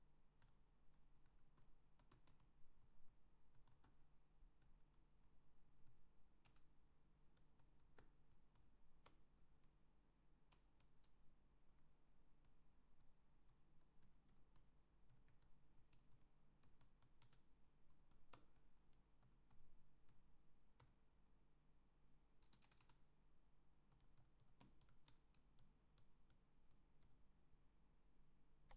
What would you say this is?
typing computer
typing to de Mac, binaural recording
typing, type, computer, keyboard, mac, typewriter